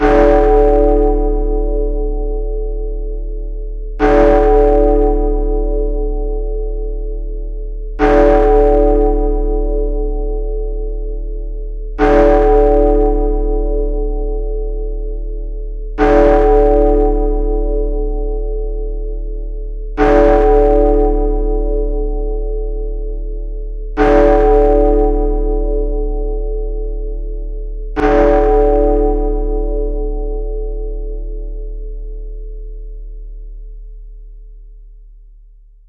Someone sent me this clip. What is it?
Large bell with 8 strikes. 4 seconds between strikes; long tail.